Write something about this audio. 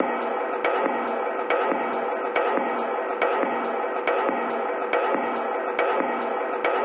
Space Tunnel 3
beat, dance, electronica, loop, processed